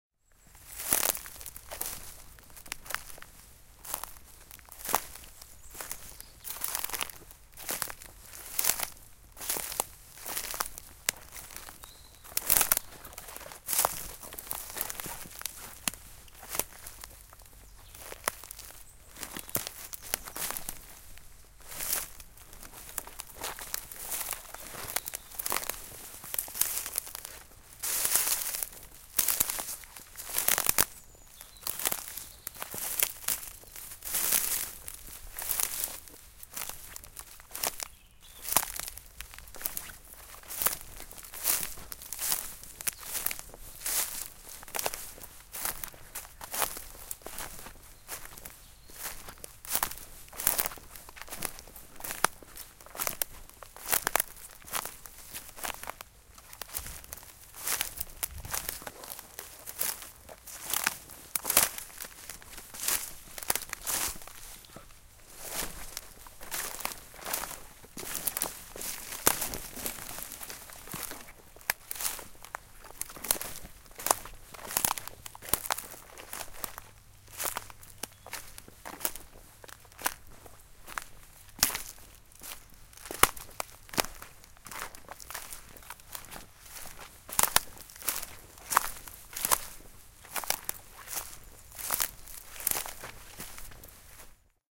Raw audio of footsteps in twigs, bracken and other dry foliage when straying off of a footpath. The recorder was pointed at my feet, about 1 meter away.
An example of how you might credit is by putting this in the description/credits:
The sound was recorded using a "Zoom H6 (MS) recorder" on 16th February 2018.